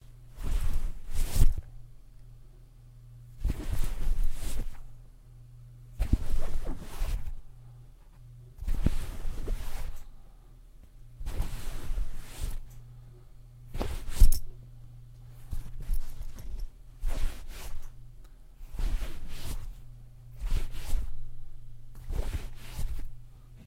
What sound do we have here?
Pull something out of pocket
Sound of something pulled out of a pocket or bag.
pocket, stuff, tug